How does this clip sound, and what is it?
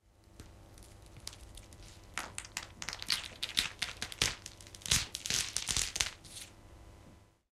A lot of sound design effect sounds, like for breaking bones and stuff, are made from 'vegetable' recordings. Two Behringer B-1 mics -> 35% panning.